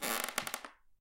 Wood Creak Single V1
A single creaking wooden floor step. This is one of 7 similar sounds and one longer recording with 4 creaks in the same sound pack.
boards, squeaking, dark, walk, foley, soundfx, walking, step, horror, wood, sound, stepping, single, creak, old, creepy, foot, house, board, effect, footstep, creaking, floor